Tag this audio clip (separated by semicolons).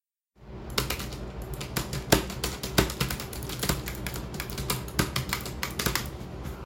Keys; Keyboard